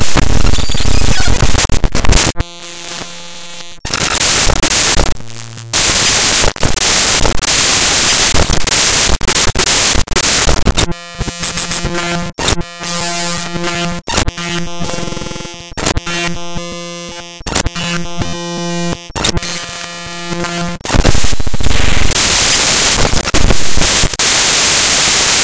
created by importing raw data into sony sound forge and then re-exporting as an audio file.